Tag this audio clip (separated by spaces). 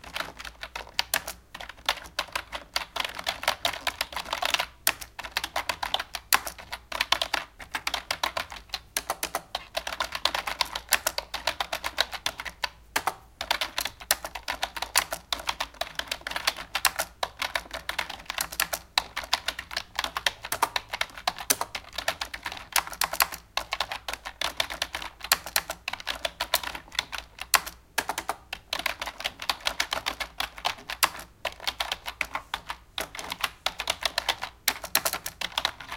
Keyboard Typing computer